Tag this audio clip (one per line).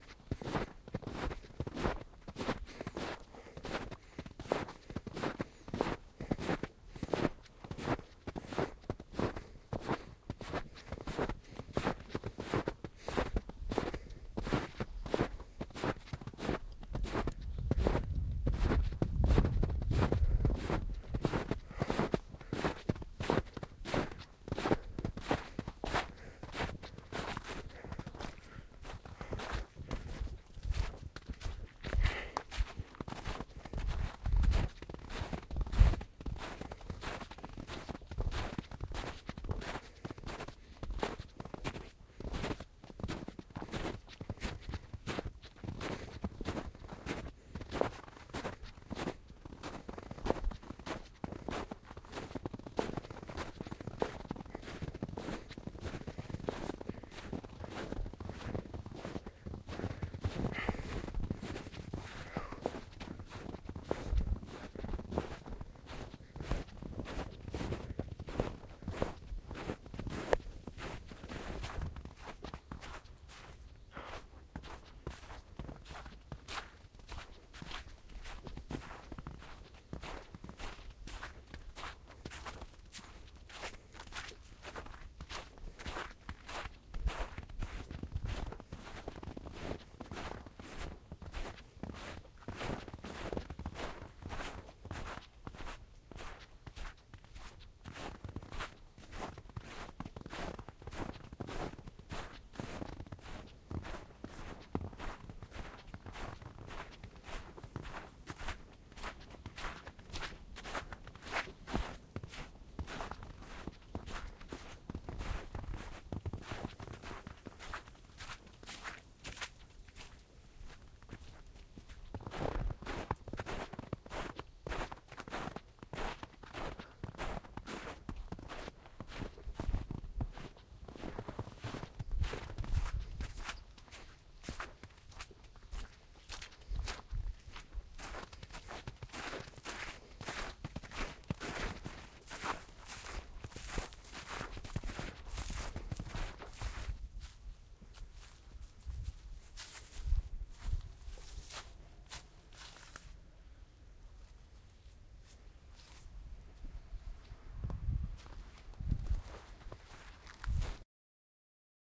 footsteps
forest
snow
walking